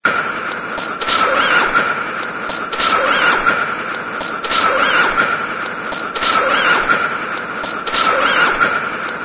003 - STEAMPUNK FLYING MACHINE
A mix of two loops, forming a machine pattern look liking an steampunk flying machine or artificial respiration machine.
Made in a samsung cell phone, using looper app, and my body and voice noises.